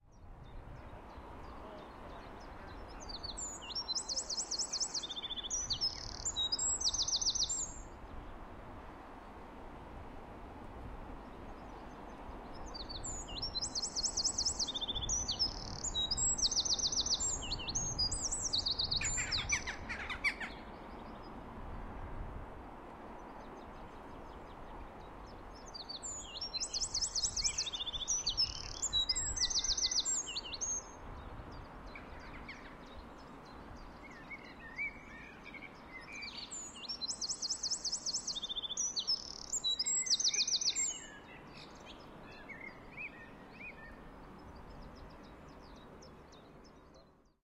Birds In City Park.
birds morning garden birdsong field-recording bird nature forest spring ambience ambiance ambient general-noise countryside soundscape atmosphere background-sound
Album: Nature sounds Of Scotland